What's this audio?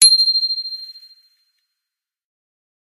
bicycle-bell 13
Just a sample pack of 3-4 different high-pitch bicycle bells being rung.
ting, strike, hit